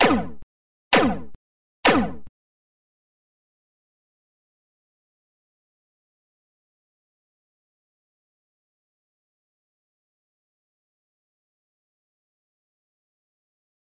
bell laser sound beat
bell, laser